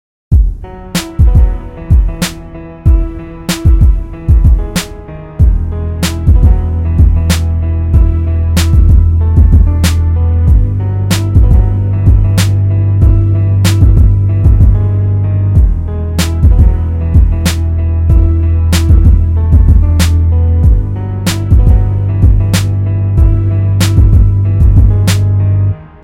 Rap beat loop in which I used different strings and piano samples.